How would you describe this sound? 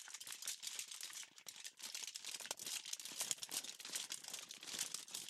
Hail Falling on Concrete
Hail falling on the concrete.